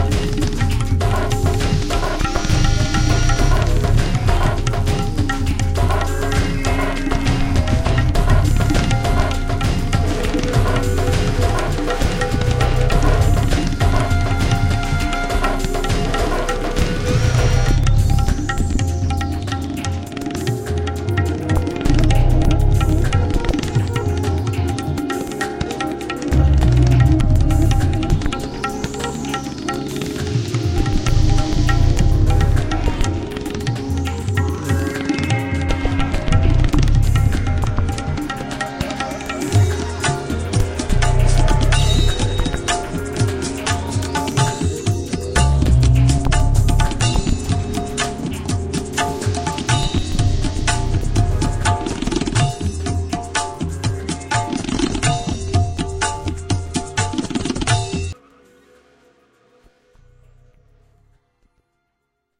Indian reality
Feel the hectics of the Indian subcontinent.
Made with Grain Science and Vogue MK2 apps and Apple loops, edited in Garageband,